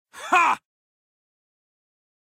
"HA!" - NO reverb
Warrior aggressively yelling "Ha!" with no added reverb.
Taken from my Viking audio drama: Where the Thunder Strikes
Check that story out here:
Never stop pluggin', am I right? haha.
I hope you this will be useful for you. Cheers~
aggressive, male, King, brute, voice-acted, speech, yell, angry, hoarse, voice-acting, shouting, shout, voice